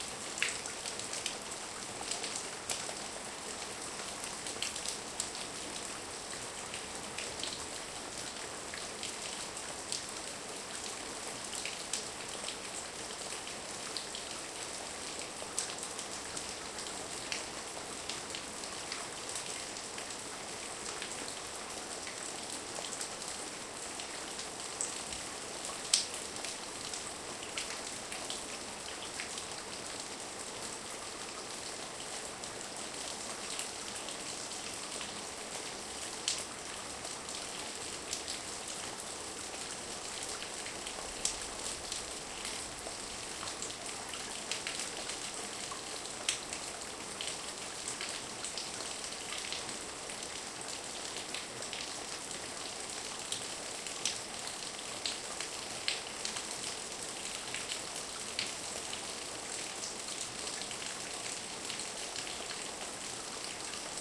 Hard rain
Heavy rain falling in my backyard.
Rec with a Marantz PMD 661, internal mic, Stereo
rain, hard